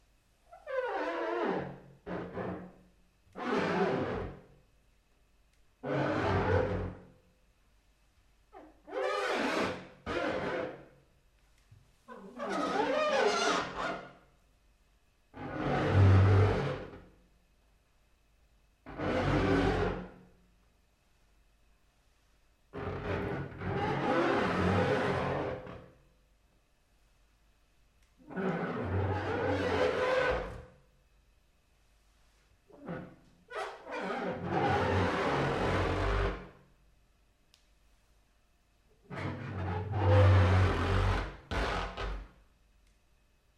bathtub squeaks
A selection of noises made by rubbing a wet enamel bathtub.
Recorded using a cheap mono back-electret mic and an old Sony Minidisc recorder.
Recorded hastily but I hope of use to someone.
bath, rub, squeak, bathtub